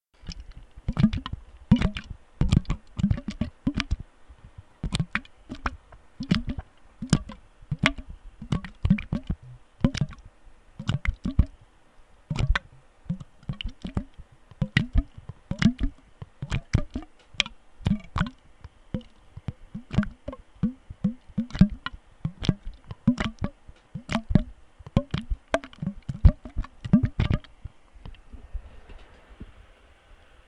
This is the sound of a chocolate fountain drain. The sound was created for a sc-fi film
fountain, glug